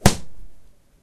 This is a homemade slap using various different slaps that have come from hitting a cushion with bamboo and slapping the wall.
bamboo, fight, Hit, kick, punch, Slap, whip